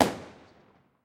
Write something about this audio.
Single sharp-sounding firework explosion going off (4 of 5). This sound is isolated from one of my long recording with multiple fireworks exploding (Explosions and fireworks).
Recorded with a Tascam DR-05 Linear PCM recorder.